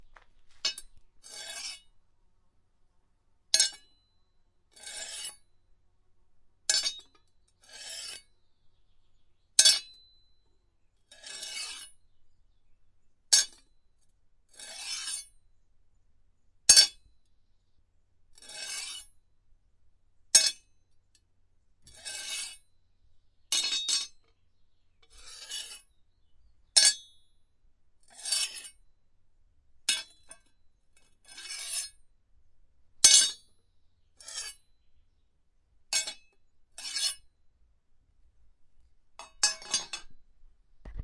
Knife scrape and hit
Hitting and scraping an anvil with a large knife blade.
anvil, blacksmith, blade, clang, cling, clink, field-recording, hit, knife, metal, metallic, ring, scrape, sharpen, steel, tools